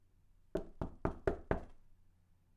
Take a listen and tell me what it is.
A simple door knock
door, knock, wooden